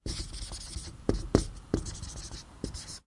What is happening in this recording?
marker-whiteboard-phrase01
Writing on a whiteboard.